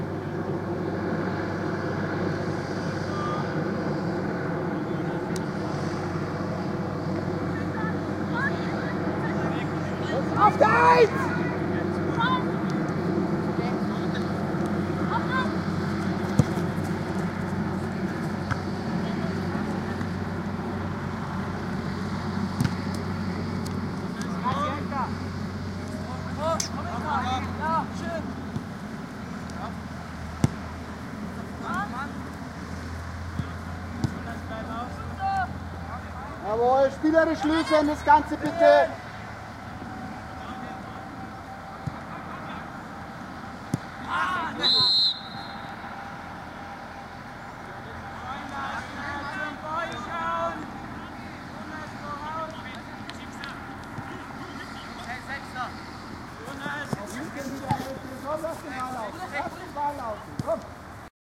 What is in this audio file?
Soccer Atmo Kids Background Machien
Some Atmos with Kids (14-16) playing a real soccergame / Coach shouting / Parents around / The language is german. Good for Background to have a real game going on.
There are different Moments edited together - so listen to the complete Clip. Hopefully u find the right little moment. Good luck and have fun
atmo, atmosphere, ball, coach, football, fussball, game, german, goal, Jugendliche, kick, kids, match, play, shooting-ball, shouting, soccer, sport